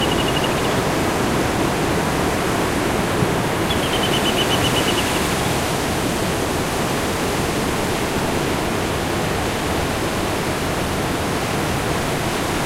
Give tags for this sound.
ave bird mar ocean pajaro sea

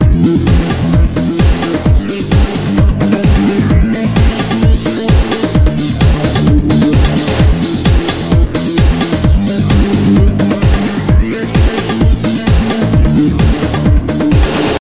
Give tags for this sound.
130bpm techno bongo trance

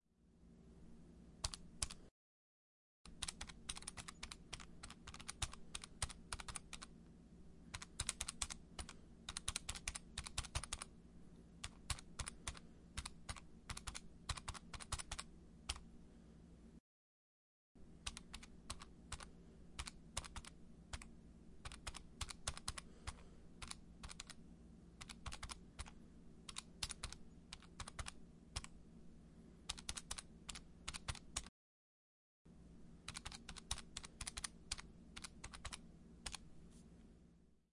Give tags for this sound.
field-recording foley mic microphone movement percussive rustle sound-design transient

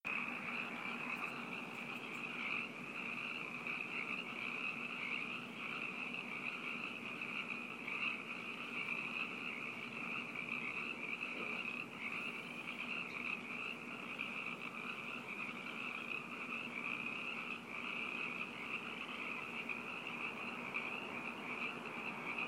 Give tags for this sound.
croak nature frog frogs creek

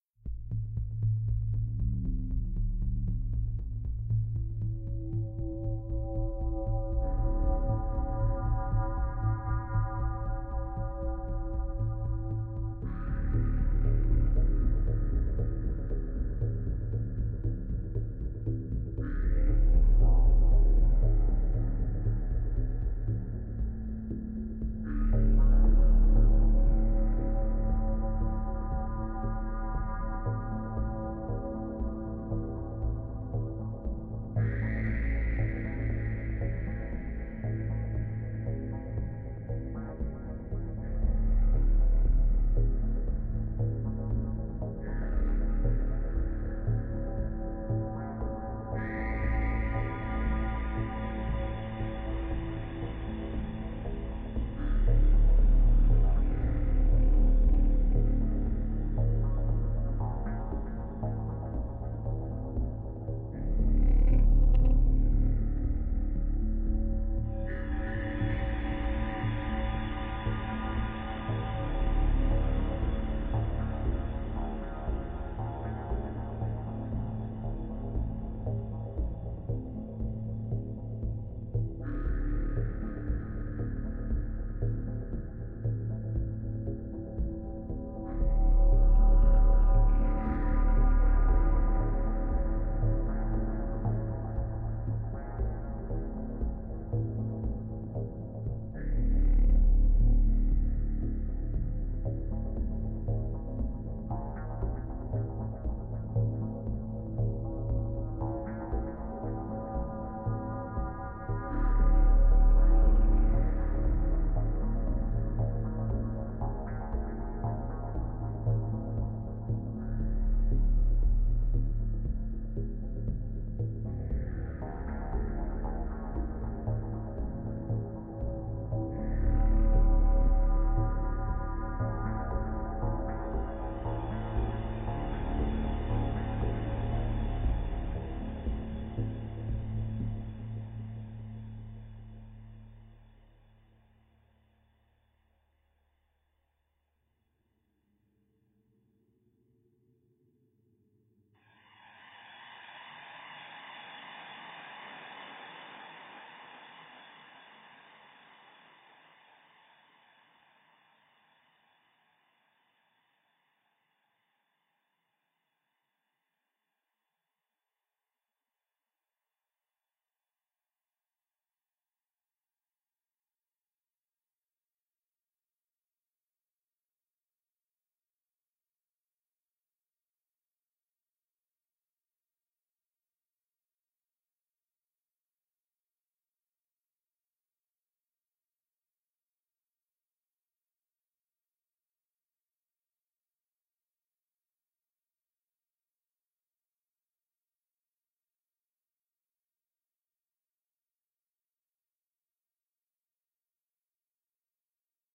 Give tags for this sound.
dramatic synthesizer drone suspenseful electronic cinematic

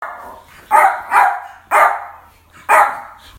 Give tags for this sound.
barking dog small terrier yorkshire

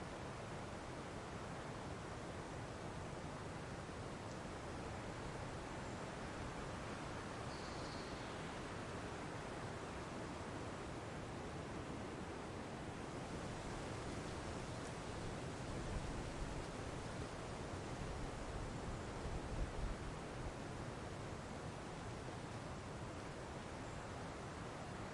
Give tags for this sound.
field birds recording forest wind zoom loop stereo h5

